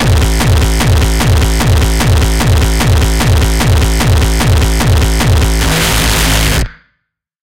xKicks - Mindtouch
There are plenty of new xKicks still sitting on my computer here… and i mean tens of thousands of now-HQ distorted kicks just waiting to be released for free.
drum, hardstyle, beat, distortion, hard, bass, distorted, bassdrum, techno, gabber, kick, hardcore, kickdrum, bass-drum